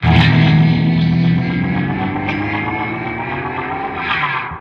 A slide on a steel string acoustic guitar, along a single string - pulled slowly up then quickly back down. A slow fade-in, then abruptly ending.
acoustic, slide, guitar, filtered